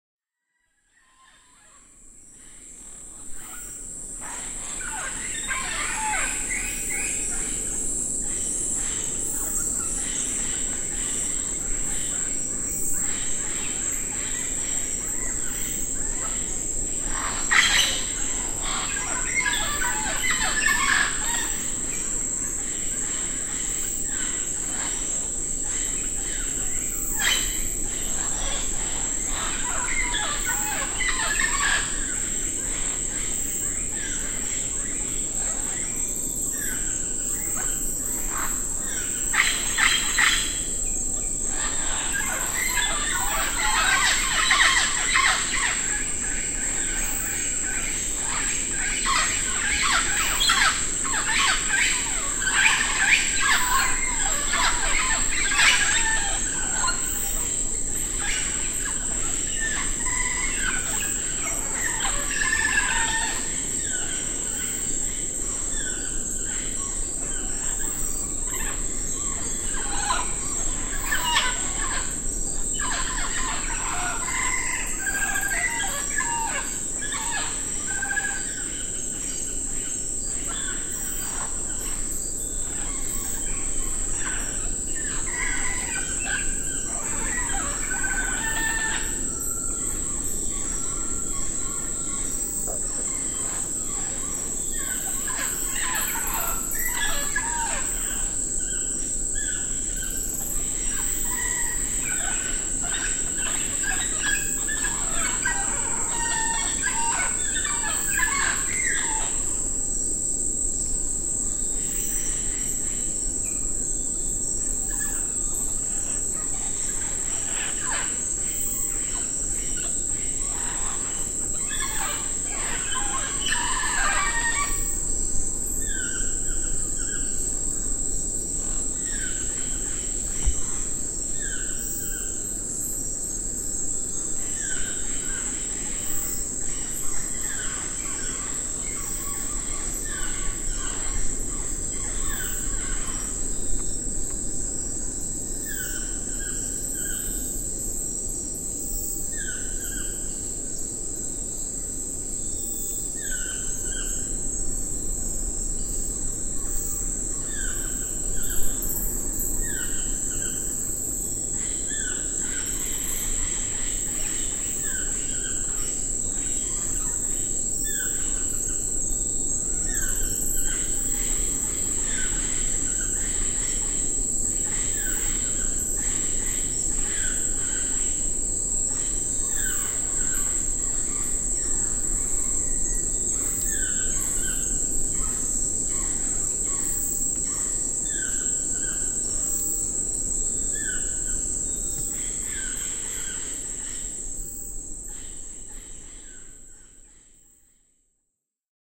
Birds in the forest from Utria at dusk, El Valle
Recordings of singing birds at dusk in the forest on the way to PNN Utria close to EL Valle, Choco, Colombia